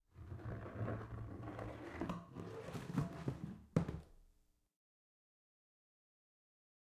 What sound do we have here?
mp garbage cans
Garbage can slides across floor.
can, garbage, garbage-can, movement, sliding